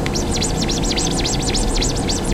mono field recording made using a homemade mic
in a machine shop, sounds like filename